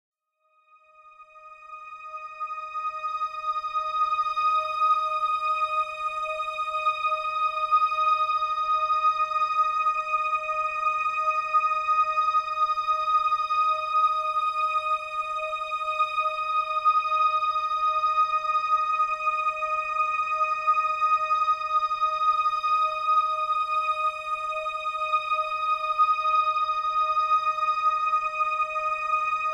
Emotional String
A captivating string sound ideal for film scoring or drawing some type of emotion.